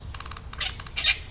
I think this is a Yellow Wattlebird.
It makes amazing noises.
It's very annoying.